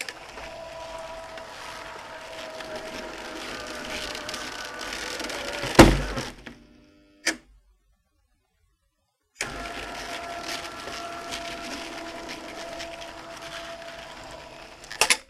An old electric roller shutter getting stuck. This is a small PVC shutter that is installed at a French window door at my house. The motor shutter gets stuck most of the time when we open the shutter, either due to lack of torque or due to lack of lubrication of the guides. This time is no different. The shutter opens, gets stuck, the switch is turned off (the click you hear is the relay actuating) and then is switched on for closing.
shutter, window